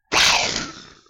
A small explosion.